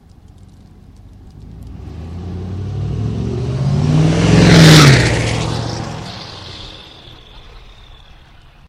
Diesel Drive by #3 MZ000007
Ford F350 highly modified diesel engine with after-market turbo drives past, another pass
Recorded with Marantz PMD660 & Sennheiser e835 Mic